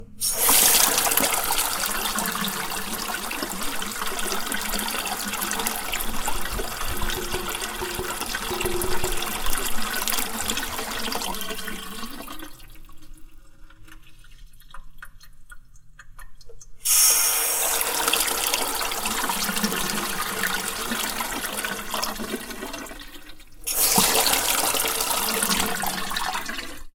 Kitchen sink - water being turned on and running
A kitchen sink faucet being turned on and water running into the dishes below.
faucet, foley, kitchen, running, sink, water